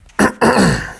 Throat Clearing
a man clearing his throat